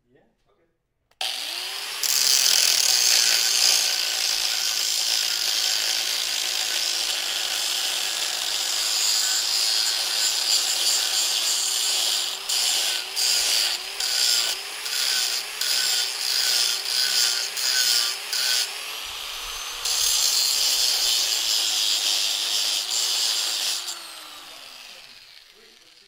Oribital Buffer Sander Tool Metal
buffer, industrial, machine, metal, tool